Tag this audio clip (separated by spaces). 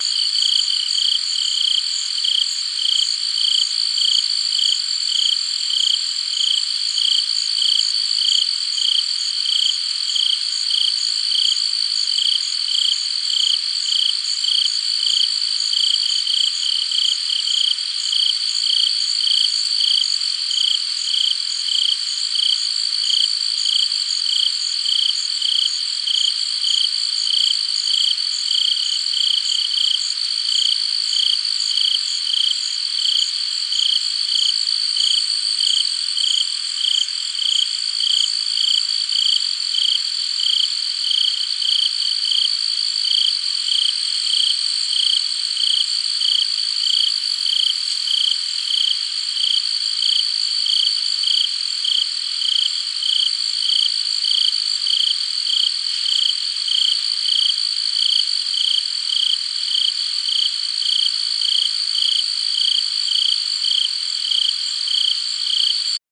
chirp chirping chirps cricket cricket-chirp cricket-chirping crickets crickets-chirping field-recording insects nature night-ambiance summer-evening